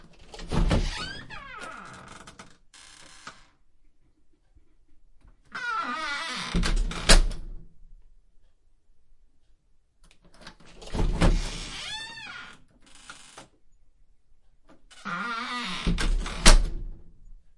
Front Door - Creaky
Opening and closing a creaky front door.
Recorded with Zoom H4N pro internal stereo microphones.
closing, creaky, door, front, Opening